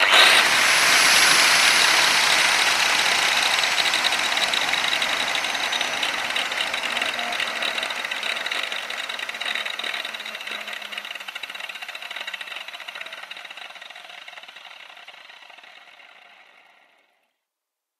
Fein angle grinder 230mm (electric) turned on, running freely and slowing down.